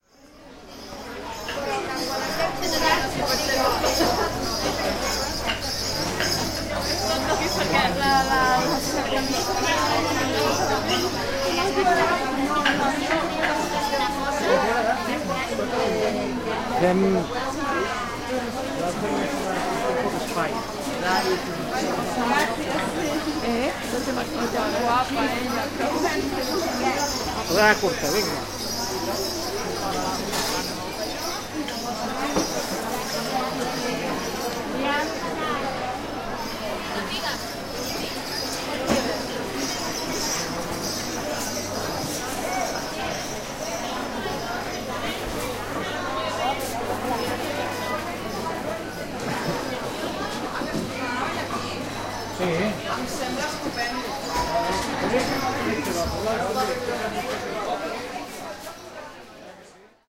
Bastoners walking through the market of Sant Andreu. Nov 30, 2013. Zoom H2.
bastoners, bells, field-recording, market, people, rattles, sonsstandreu, soundscape